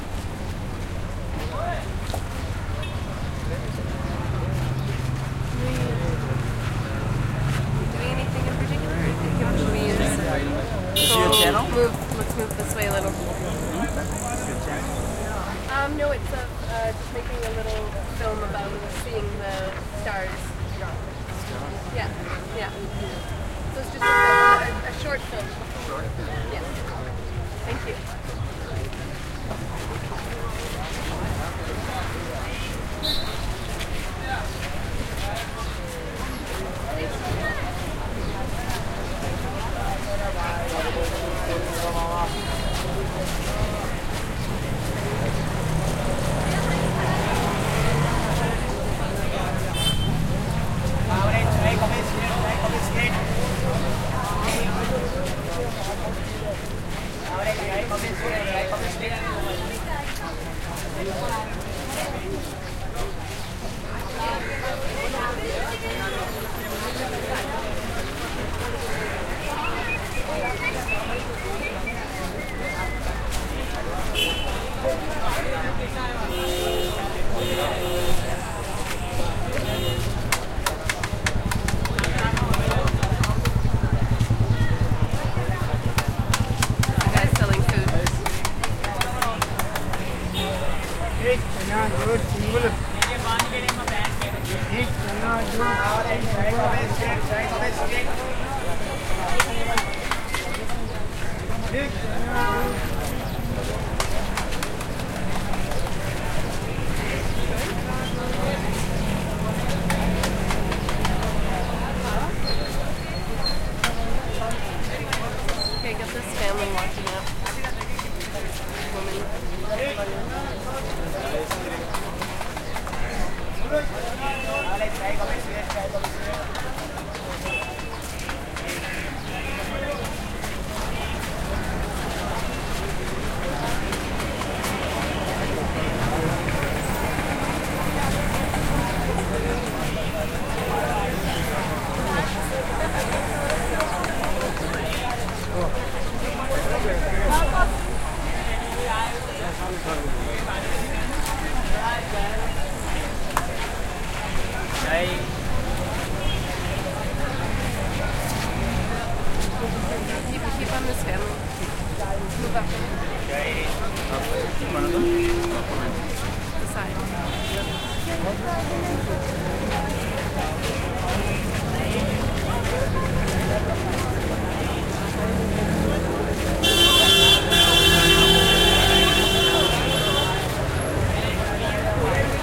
crowd ext medium busy street downtown market movement steps throaty traffic motorcycles rickshaws horn honks India
busy, motorcycles, downtown, honks, horn, ext, rickshaws, India, movement, market, medium, throaty, traffic, crowd, steps, street